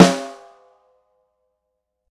Unlayered Snare hits. Tama Silverstar birch snare drum recorded with a single sm-57. Various Microphone angles and damping amounts.
Shot, Sm-57, Snare, Unlayered